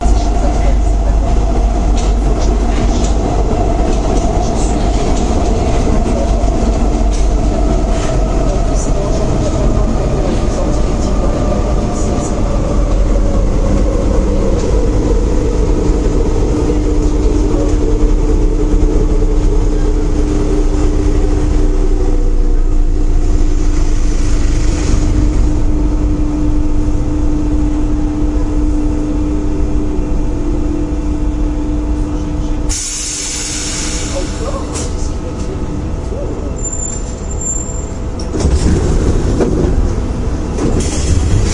Subway Paris
metro in Paris